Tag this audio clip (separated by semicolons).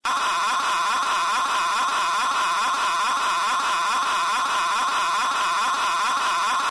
computer; robots; alerts; alarm; machine; loop; sci-fi; weird; noise; time; cool; scientist; space; engine; vintage; science; ovni; ufo; looper; motor; alien; alarms; robot; future; factory; scifi